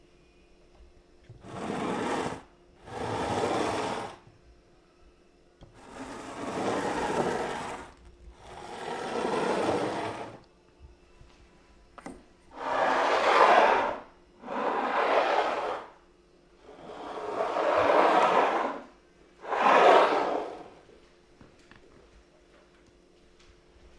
heavy glass sliding on table. Can be pitched down to simulate a large object moving like a stone.